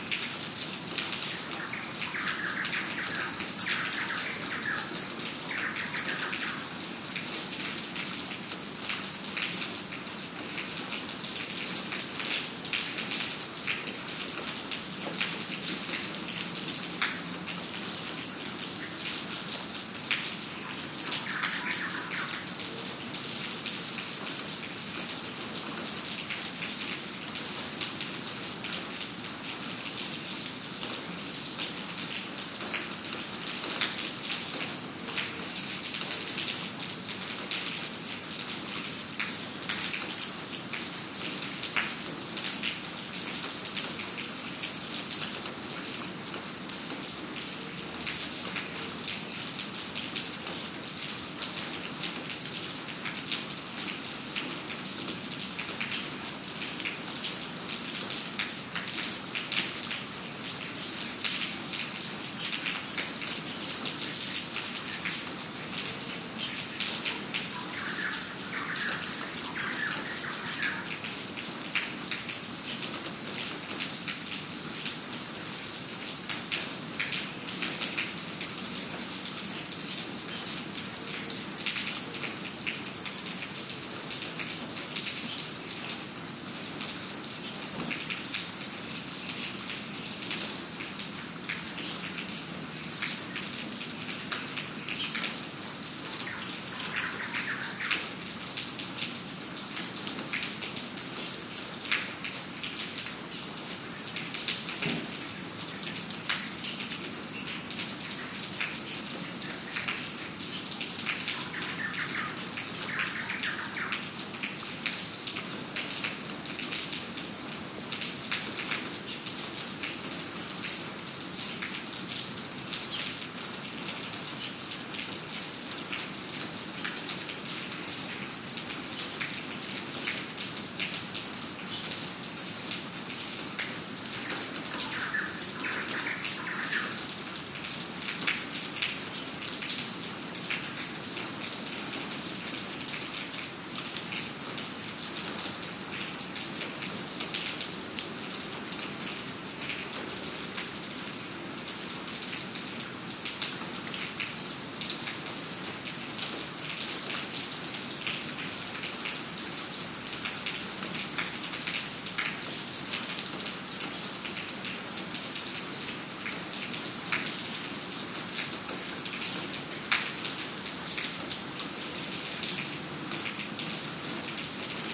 20160309 02.rain.n.birds
Noise of rain on pavement + bird callings. Soundman OKM capsules into FEL Microphone Amplifier BMA2, PCM-M10 recorder. Recorded near Puerto Iguazú (Misiones Argentina)
birds, field-recording, forest, rain, water